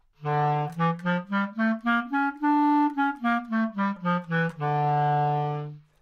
Part of the Good-sounds dataset of monophonic instrumental sounds.
instrument::clarinet
note::D
good-sounds-id::7645
mode::natural minor